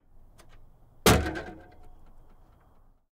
Car hood-Slam-hollow-metallic
Car hood slam hollow metallic sound
hood, slam, metallic, hollow, car